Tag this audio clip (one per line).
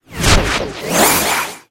abstract
cinematic
dark
destruction
drone
futuristic
game
glitch
hit
impact
metallic
morph
moves
noise
opening
organic
stinger
swoosh
transformation
transformer
transition
woosh